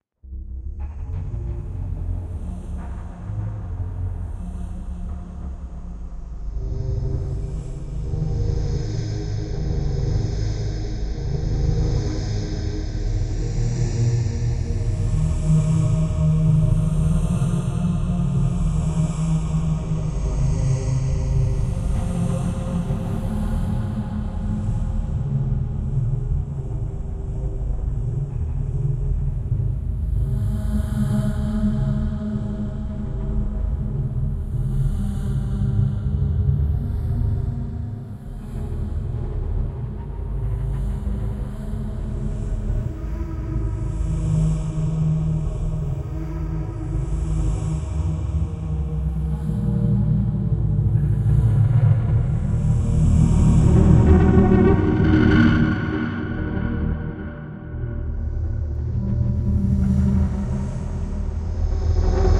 North Shaman Ambiance
North Shaman - Sound Design
SFX conversion Edited: Adobe + FXs + Mastered
Music
Free, Movie, Amb, Sound, Shaman, Dramatic, Voice, Cave, Public, North, Soundscape, Ambience, Atmosphere